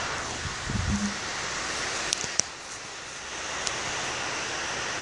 Just a weird noise my recorder picked up before I turned it off
ghost, static, weird, glitch, glitchy